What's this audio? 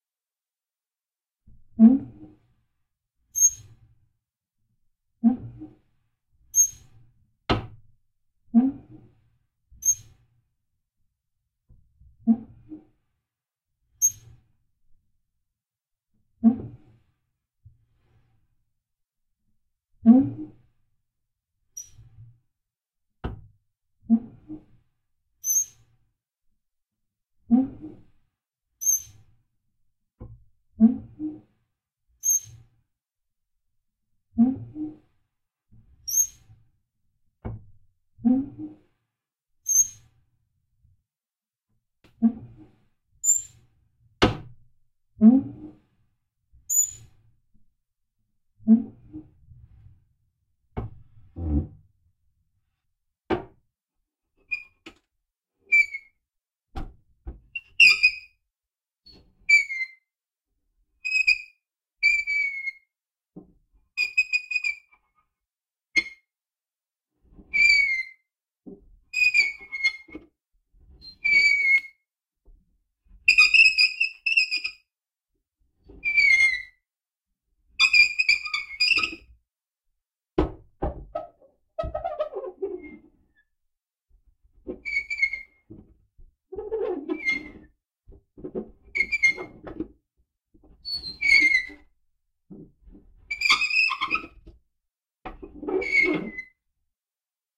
Metal Door Squeaks
A mono recording of a woodstove door hinge squeaking, after 58 seconds the handle is forced to squeal.